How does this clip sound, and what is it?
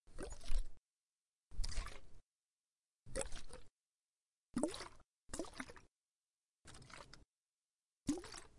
Liquid moving in a plastic bottle
Me moving some lemon water in a medium-sized plastic water bottle gotten out of a vending machine. It's a good clip for isolating single sound effects and using them.
bottle, plastic, shake, water